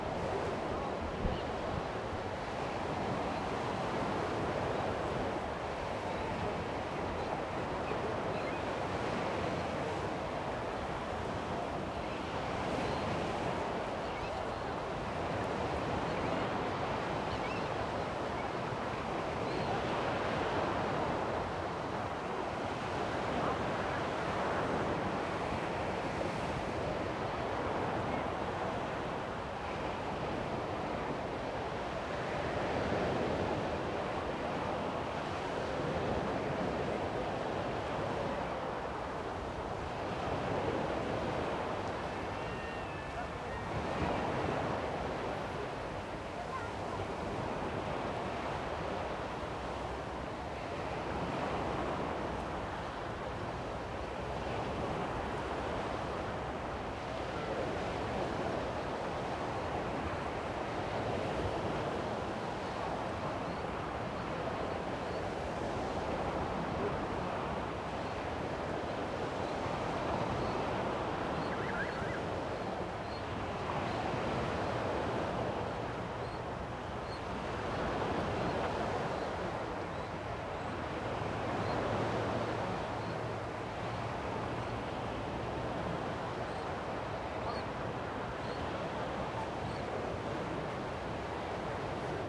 mono-atmo-mkh60-2
mono fisherman village